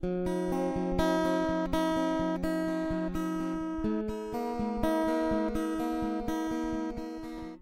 Samples of a (de)tuned guitar project.
chords, guitar, picking